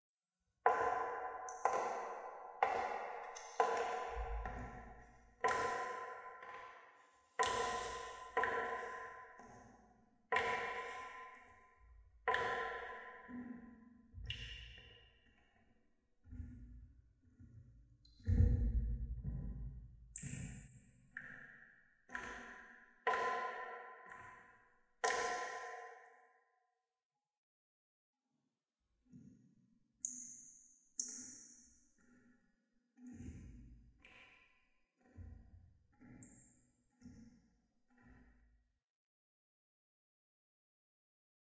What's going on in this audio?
Water Drip
water, splash, trickle, liquid, drip